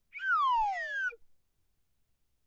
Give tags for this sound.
soundeffect
silly
whistle

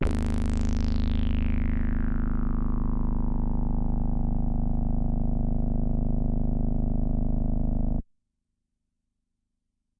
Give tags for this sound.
multisample
cs80
single-note
synth
analogue
midi-velocity-16
GSharp0
midi-note-20
deckardsdream
ddrm
synthetizer

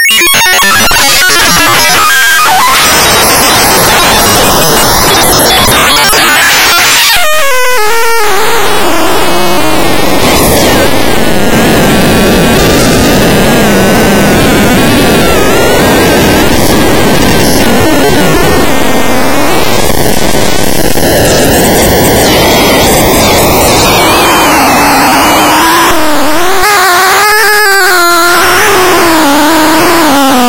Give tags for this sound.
noise
noisy
synthesized
glitch

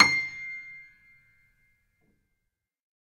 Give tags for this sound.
Grand-Piano
Keys
Piano
Upright-Piano

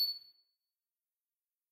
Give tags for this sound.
beep
buzz
computer
sci-fi
bleep

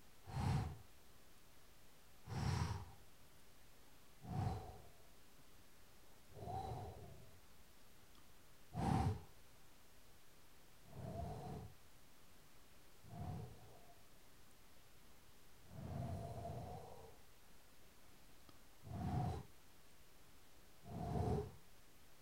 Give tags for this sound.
swish
swoop
whoosh

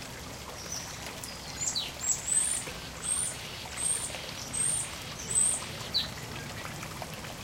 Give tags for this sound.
birds
zoo
jungle
rainforest
weaver
bird
aviary
water
tropical
songbird
exotic

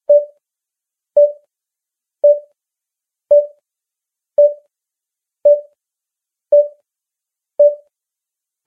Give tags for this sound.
computer
digital
effect
electrocardiogram
hospital
science-fiction
sci-fi
SF
wave

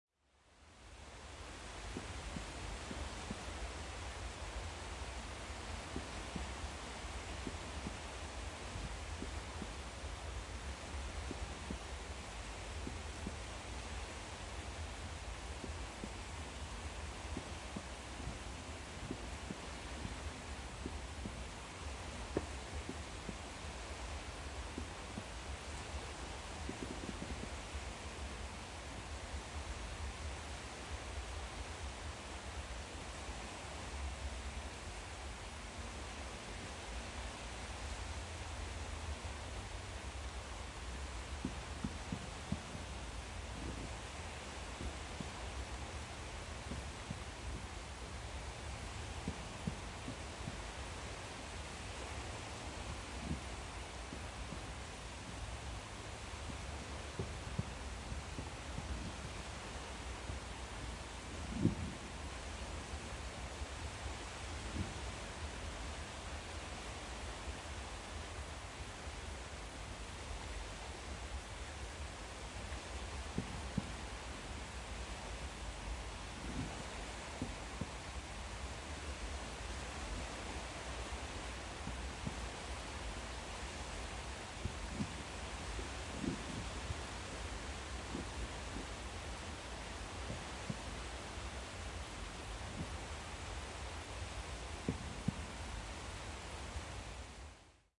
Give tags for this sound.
newyear
beach
celebration
fireworks
sea

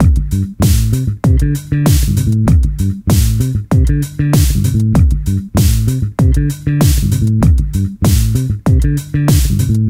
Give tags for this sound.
Ableton-Bass
Ableton-Loop
Bass
Bass-Groove
Bass-Loop
Bass-Recording
Bass-Sample
Bass-Samples
Beat
Compressor
Drums
Fender-Jazz-Bass
Fender-PBass
Funk
Funk-Bass
Funky-Bass-Loop
Groove
Hip-Hop
Jazz-Bass
jdxi
Logic-Loop
Loop-Bass
New-Bass
Soul
Synth-Bass
Synth-Loop

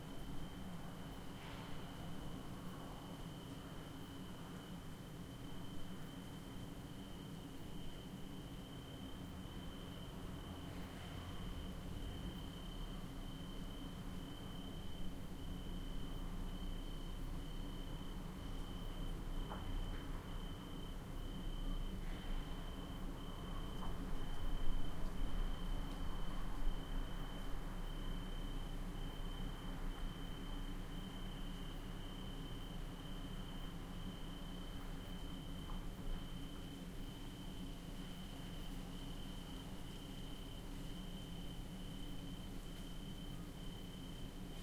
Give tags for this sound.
villa
insects
outdoors

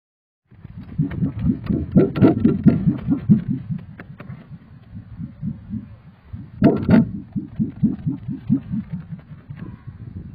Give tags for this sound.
board
flexible
sheet
wobble

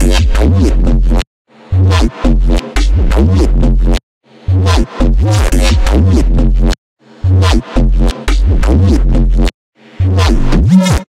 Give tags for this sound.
bass
beat
break
breakbeat
dance
dnb
drum
drumandbass
drumnbass
drums
drumstep
dub
dubstep
groovy
hard
kick
loop
sandyrb
snare
techno

